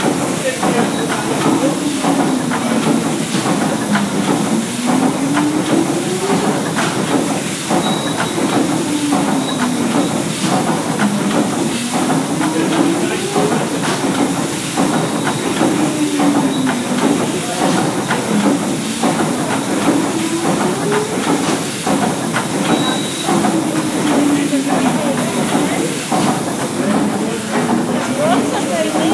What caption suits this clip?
Paddle steamer engine - short
The engine of the PS Waverley paddle steamer, spinning and whirring along.
Also available in a 5-minute version.
Recorded on Zoom iQ7.
boat, engine, machine, mechanical, paddle-steamer, steamer, whirring